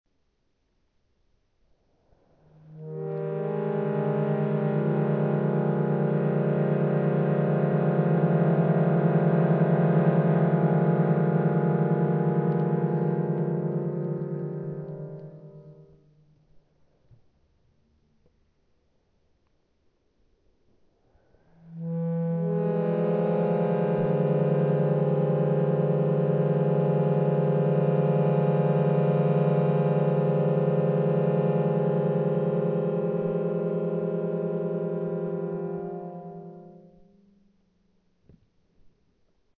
use accordion as a sound effect...it recorded by H1 handy recorder...slow down and reverb by Premiere CS5